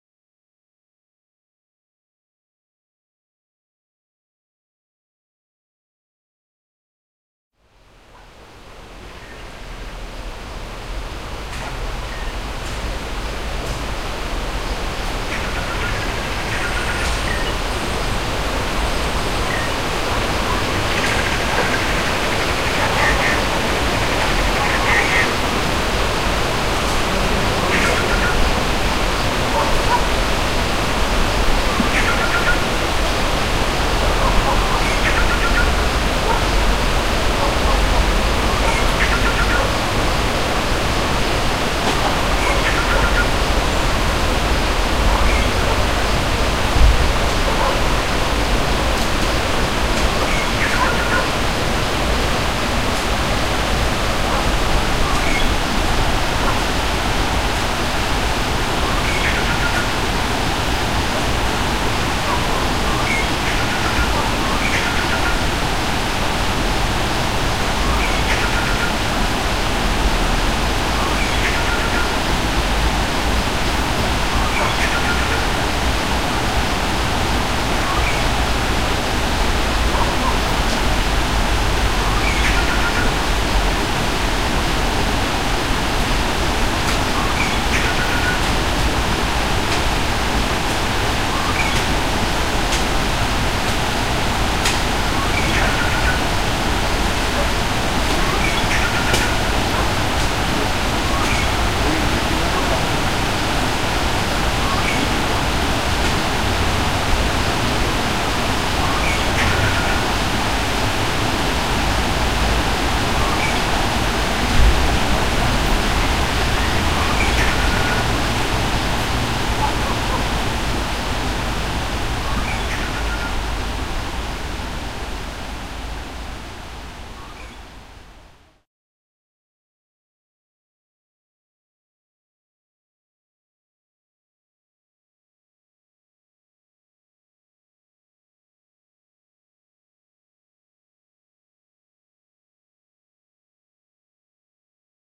A bird sings in the trees in the morning. A Himalayan stream gushes in the background. Recorded live at Kabira courtyard, at Osho Nisarga, near Dharamshala in Himachal Pradesh,
India, at 9:00am, on 01 Oct 2006. Meditative sounds, that deepen as the
soundscape fades into a deep silence. You are encouraged to copy and
share this with friends.